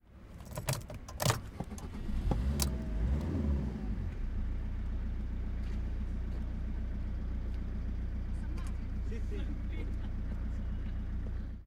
Engine On Fiat Panda 2007 Internal 01
2007, Engine, Fiat, Internal, On, Panda